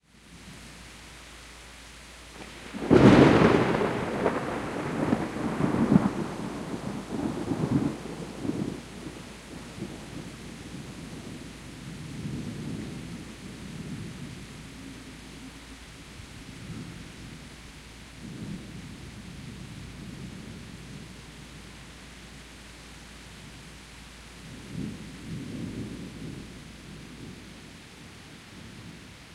A close - violent thunderstorm.
Recorded Spring of 1989 - Danbury CT - EV635 to Tascam Portastudio.
thunder, soundeffect, field-recording, thunderstorm